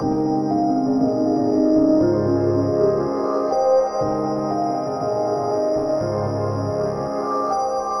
This sound or sounds was created through the help of VST's, time shifting, parametric EQ, cutting, sampling, layering and many other methods of sound manipulation.
beat, sound, beep, created, track, loops, electronica, song, Sample, Manipulated, loop, bop, music, electronic, dance